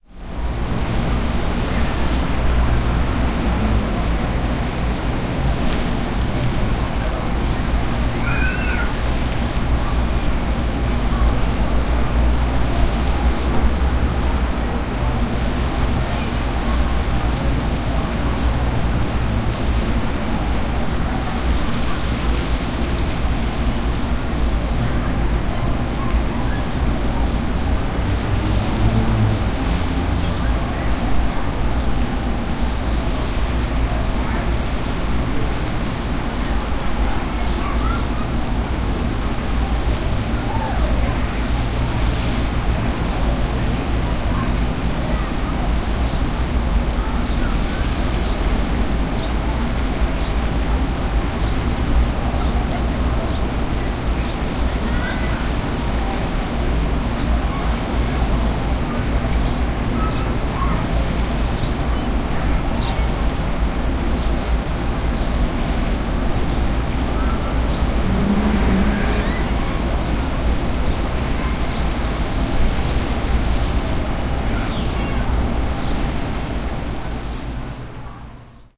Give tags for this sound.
noise; white; high; street; building; cars; traffic

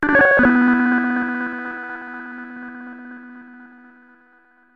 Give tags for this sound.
Gameaudio; Sounds

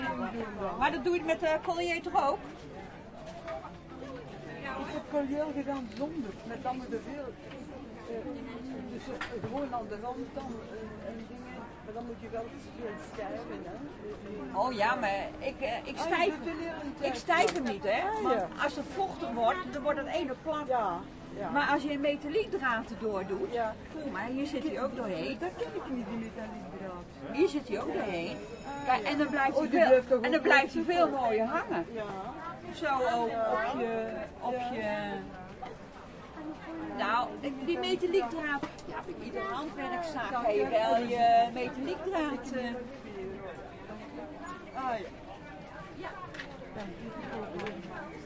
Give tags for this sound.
selling; crafts; market; artisans-market; conversation; holland; tourist

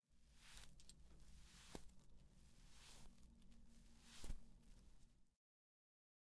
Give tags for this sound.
Effect; Fabric; Foley

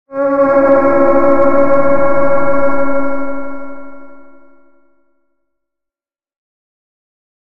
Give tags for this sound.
ableton; audio-special-fx; loop; usable

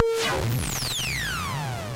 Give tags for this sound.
future-retro-xs; conga; tr-8; metasonix-f1; symetrix-501; tube

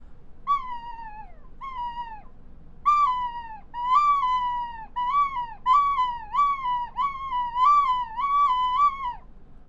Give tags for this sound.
Fx; Seagull

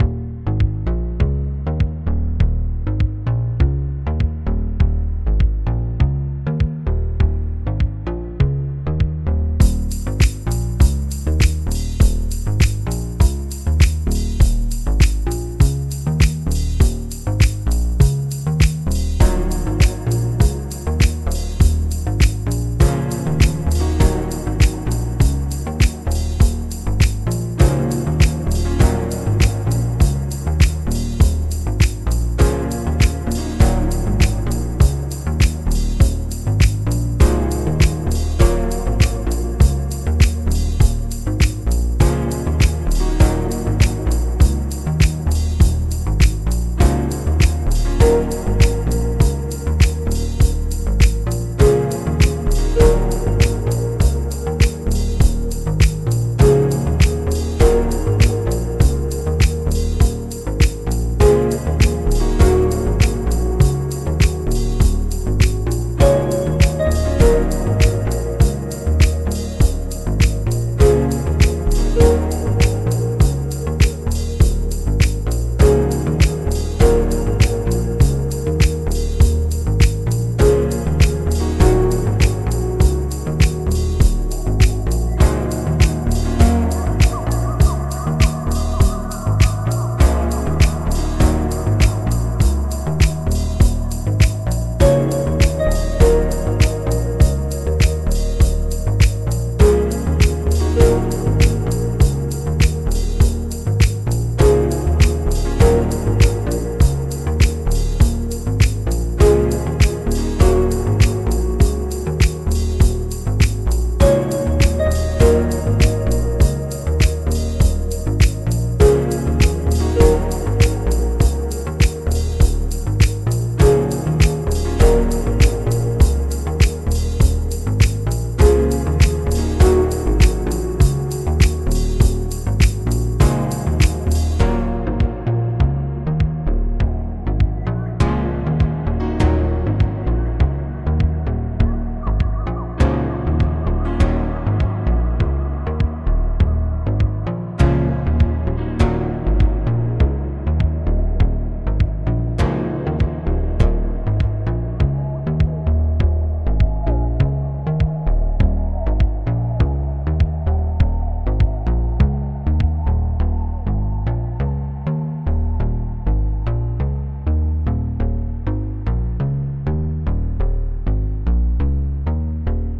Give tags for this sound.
electronic; Glass; music; sound; synth

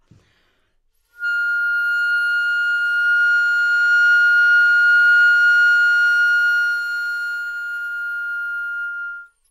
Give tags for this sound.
F5; flute; good-sounds; multisample; neumann-U87; single-note

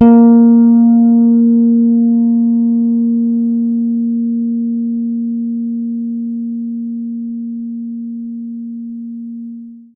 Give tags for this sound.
bass; electric; tone

